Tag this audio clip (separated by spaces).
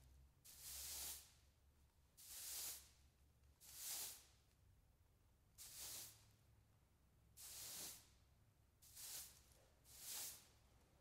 good broom sweeping sweep cleaning quality